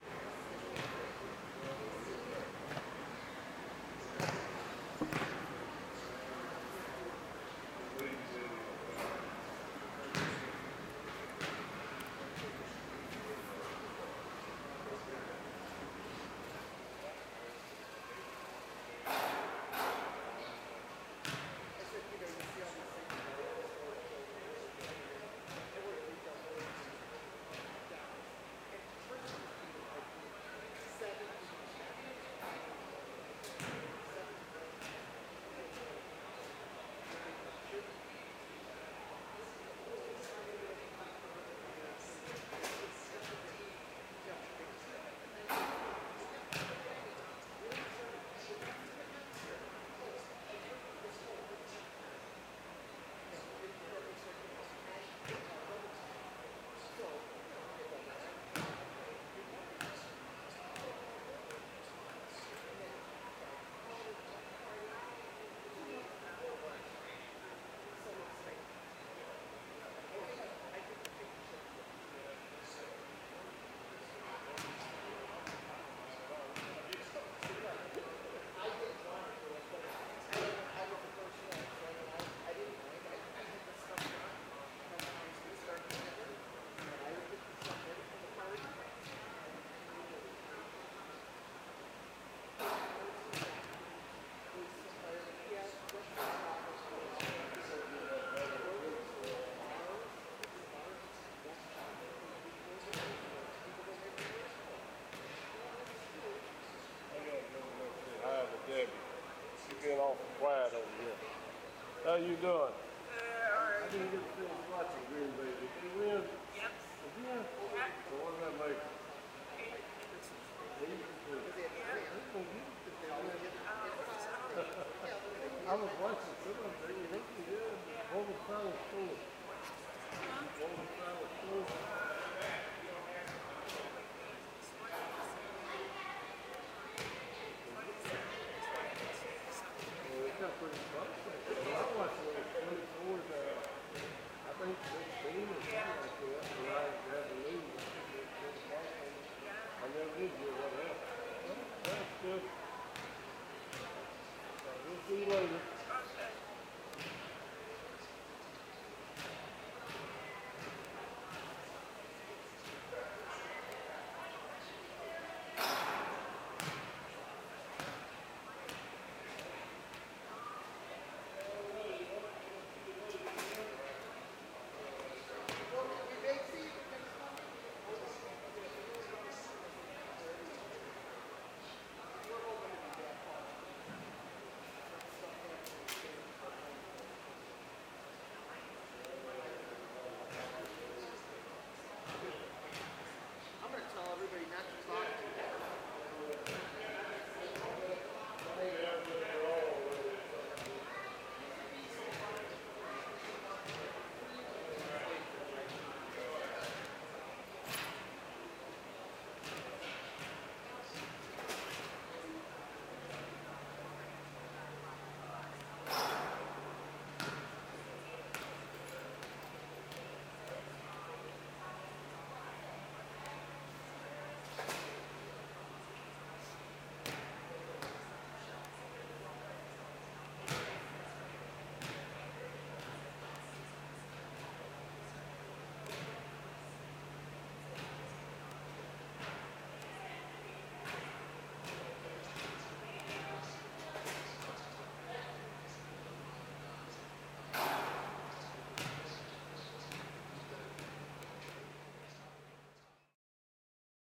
Union Station Ambience 001
Ambience inside Union station Chicago.